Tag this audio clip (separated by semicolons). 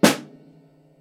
drumset; dataset; drums; snare; Shure-PG82; sample; snare-drum